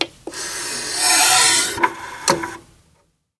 MAC LABS CD TRAY 016
We were so intrigued by the sound that we felt we had to record it. However, the only mics in our collection with enough gain to capture this extremely quiet source were the Lawson L251s with their tube gain stage. Samples 15 and 16, however, were captured with a Josephson C617 and there is a slightly higher noise floor. Preamp in all cases was a Millennia Media HV-3D and all sources were tracked straight to Pro Tools via Frontier Design Group converters. CD deck 'played' by Zach Greenhorn, recorded by Brady Leduc.
c617, cd, close, closing, clunk, deck, drive, hiss, josephson, l251, labs, lawson, mac, mcd301, mcintosh, mechanism, media, millennia, open, opening, player, transport, tray